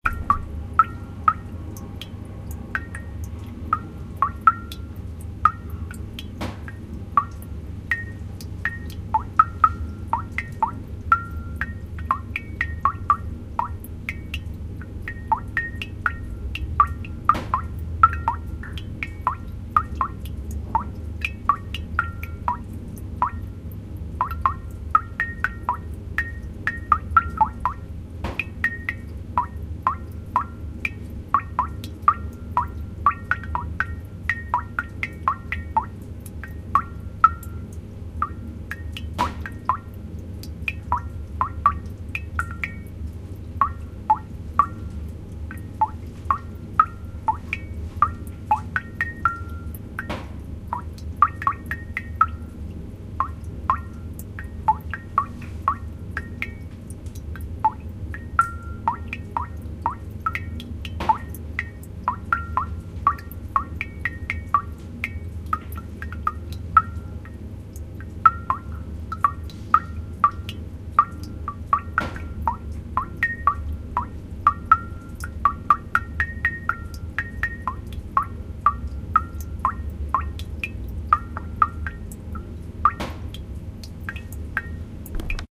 Tropfen im Loch 02
filed-recording water drops groovy into hole
beat, nature, hole, groove, rhythm, water, drop, filed-recording